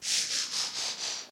A more, accurate in my opinion-tighter and higher pitched sound than my other. I did make this, not ripped from the show or any games using a combination of my breath and special effects. This is the sound that would play when a Transformer transforms into their alternate mode. Reversing the sound gives the opposite effect-or, "transforming out." This is more of a deeper fuller sound than my other.
transformers, decepticon, roll, transform, out, autobot